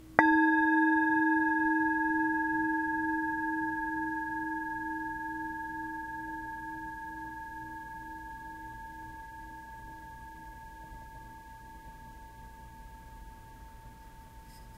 singing bowl hit 3
Hitting my Tibetan singing bown (I believe F#)
tibetan, meditation, bell, ringong, bowl, singing-bowl, singing, ring